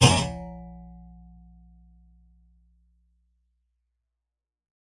BS Hit 1
metallic effects using a bench vise fixed sawblade and some tools to hit, bend, manipulate.
Clunk, Sound, Effect, Bounce, Metal, Thud, Hit, Hits, Dash, Sawblade